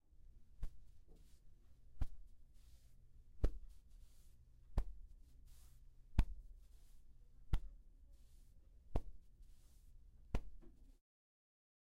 13-Fall On The Shoulder
Fall On The Shoulder
Fall, Shoulder, Soft